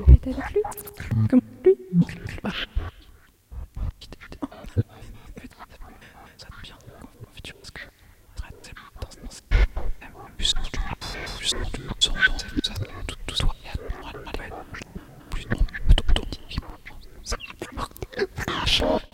A granular treatment of female voices. The grain length is very long.